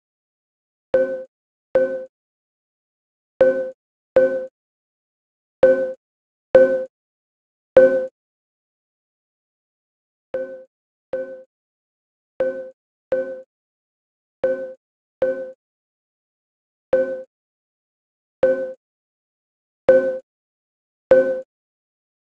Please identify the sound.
Some plucks with old zither instrument recorded at home, retuned in Ableton.